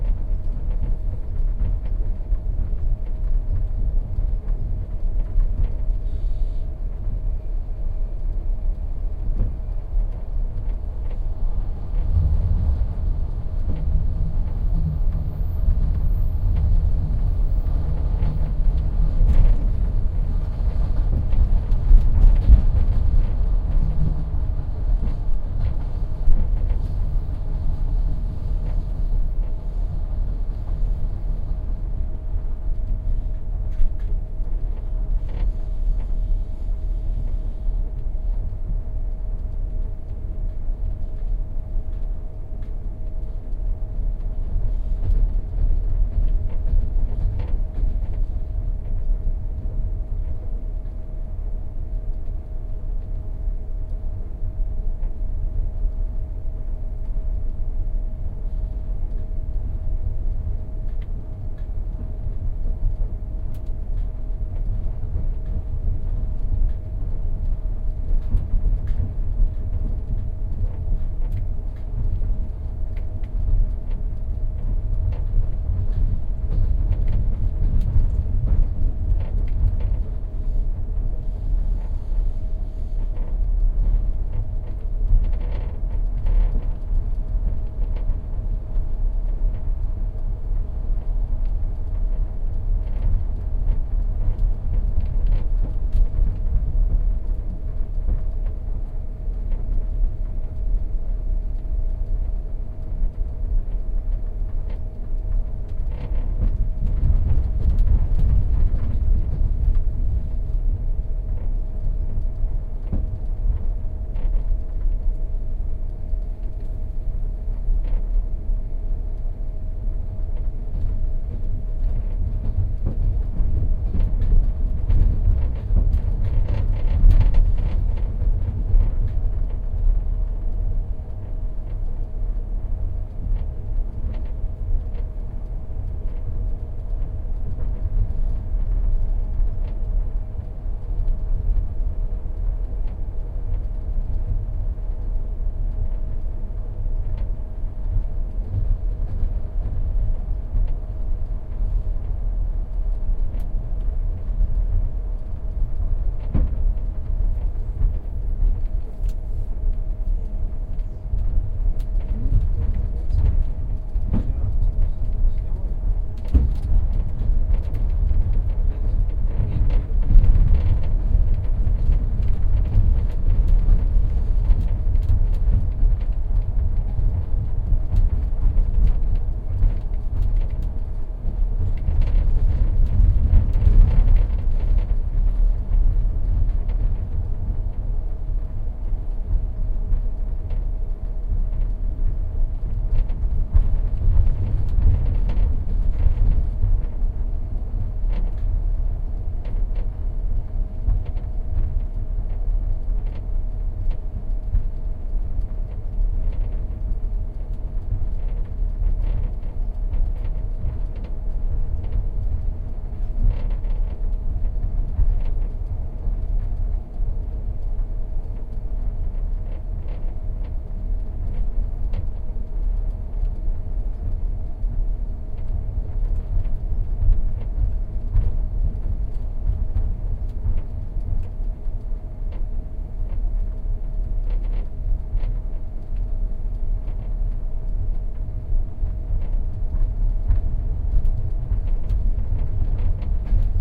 16-train-to-donetsk-everyone-asleep-lying-in-bed
At night in the night train. Lying on the bunk bed. Everyone is asleep and you can hear the rattling and creaking of various objects as the train shakes from left to right. The train was going pretty fast at this point.
field-recording, night, rattling, sleeping, train, ukraine